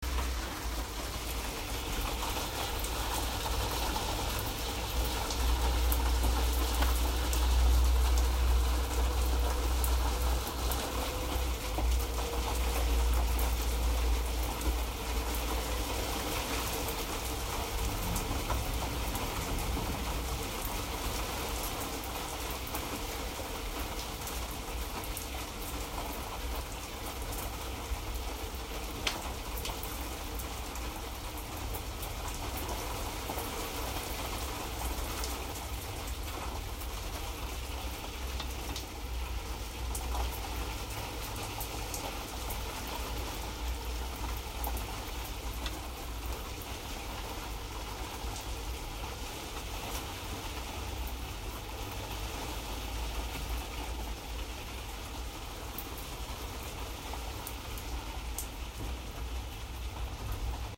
This is a city light rain.
light, water